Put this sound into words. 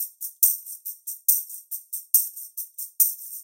Simple tambourine, @ 140BPM.